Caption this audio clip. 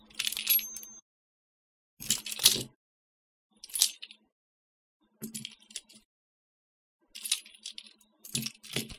picking up keys from a table
car-keys, keys, OWI, pick-up, table